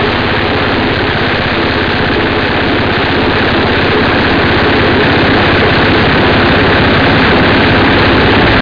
An engine sound I recorded from a lawn tractor for the use of an airplane engine sound in Aces High II.